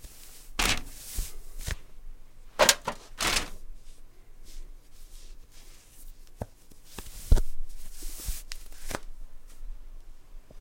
Putting on socks
Comfy, Socks, Warm